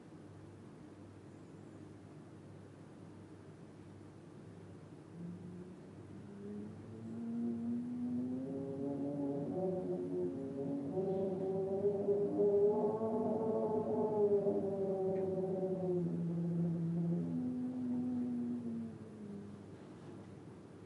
Wind sound recorded with oktava mc012->AD261->zoom h4n